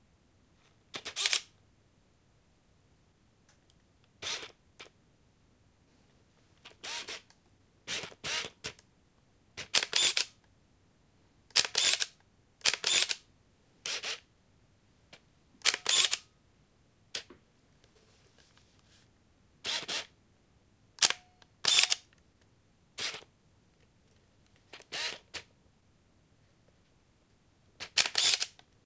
Actual sound of autofocus, shutter release and film transport of a Minolta Dynax60 (Maxxum70)
dynax, maxxum, release, transport